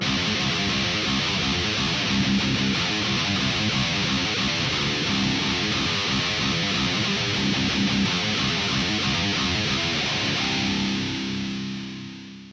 a little metal like riff recorded with audacity, a jackson dinky tuned in drop C, and a Line 6 Pod UX1.
finger-tapping, death-metal-riff, deathmetal, guitar-tapping, break-down